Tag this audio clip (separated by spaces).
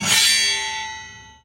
blade effect game scabbard sheath sword weapon